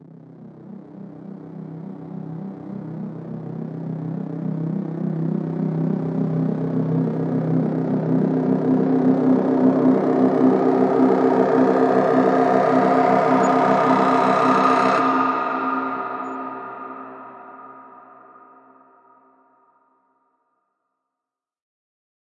A wide sounding pad which has large attack and release settings, designed to create transitions between sections or parts of a song.
release, attack, decay, climbing, pad